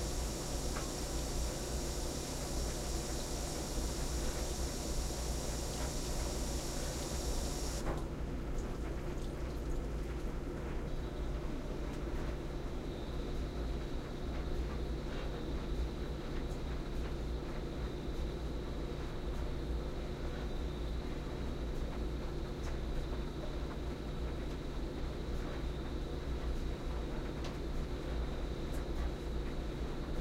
WasherEndofFill-WashCycleStartWashCycle
machine, mechanical, sounds, washing